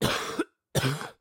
This is one of many coughs I produced while having a bout of flu.
Sickness, Flu, Cough